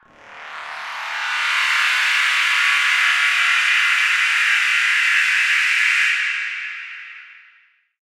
SteamPipe 1 Mechanical E3
This sample is part of the "SteamPipe Multisample 1 Mechanical" sample
pack. It is a multisample to import into your favourite samples. The
sample is a sound that in the lower frequencies could be coming from
some kind of a machine. In the higher frequencies, the sound deviates
more and more from the industrial character and becomes thinner. In the
sample pack there are 16 samples evenly spread across 5 octaves (C1
till C6). The note in the sample name (C, E or G#) does not indicate
the pitch of the sound but the key on my keyboard. The sound was
created with the SteamPipe V3 ensemble from the user library of Reaktor. After that normalising and fades were applied within Cubase SX & Wavelab.
industrial, multisample, reaktor